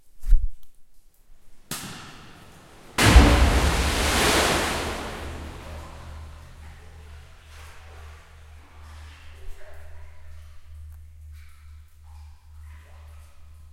wet well 000
it is deep wet well recorded H4n Zoom
field-recording, soundeffect, nature, hit, punch, ambience, Dark, wet, ambient, general-noise, soundscape, well, water